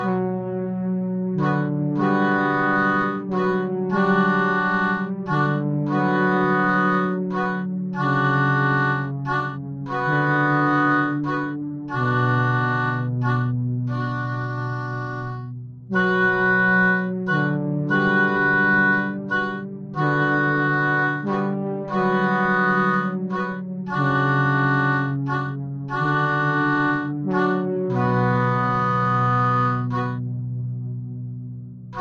Keyboard oboe (Bminor-90bpm)
A simple theme like loop in Bminor.
Recorded using Ableton.
Place: Reykjanesbær, Iceland